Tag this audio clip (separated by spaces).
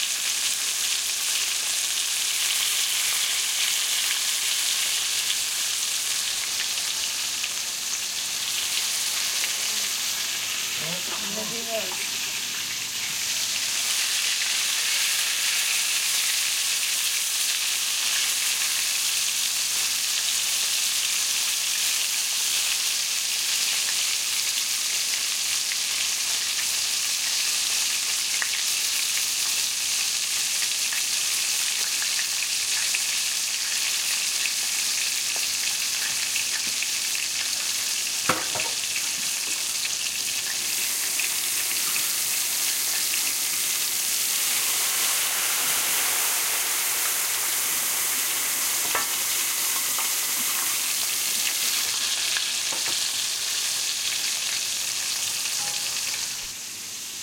kitchen pan frying